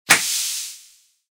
Simulated bus-brake sound, modeled after International brake sound, inside of bus
Made in FL Studio
air-brake, diesel, parking-brake, pressure, air, bus